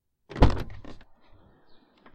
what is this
car door open from inside
Car door being opened from inside the car.
car, door, inside, interior, open